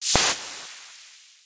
A short blast. Sounds like placing the filler valve on a tire.
maxmsp, itp-2007, audio-art, noise, blast